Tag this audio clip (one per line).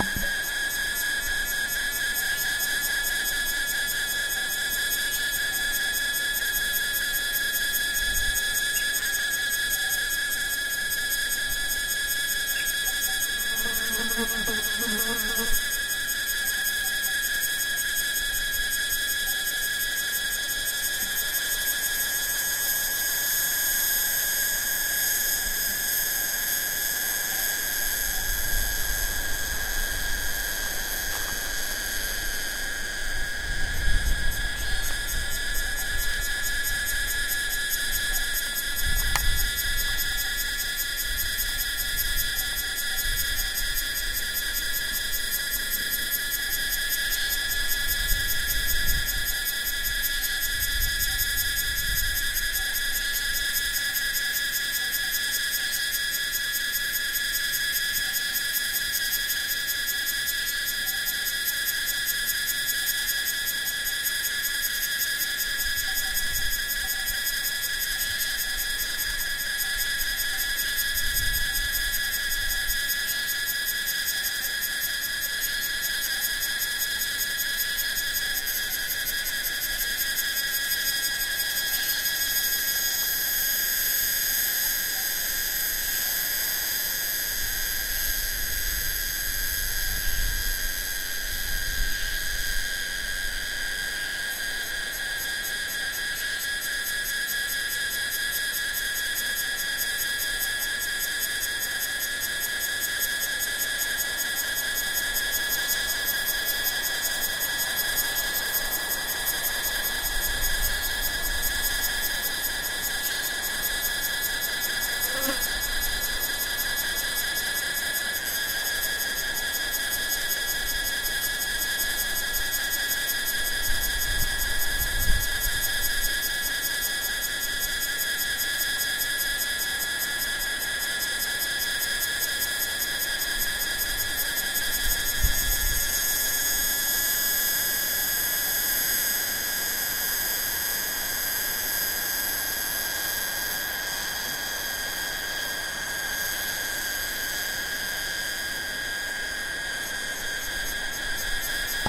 cicada
cricket
china